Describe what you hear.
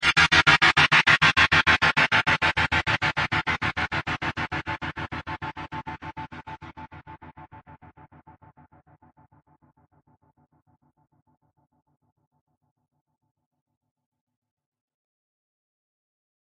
electronic stab 1 mono
A power chord stab processed through a gapper.
chopped, complex-sound, effect, electronic, future, gapper, glitch, loop, machine, mono, one-shot, oneshot, power-chord, sci-fi, sfx, sound-design, sounddesign, soundeffect, stab, synthetic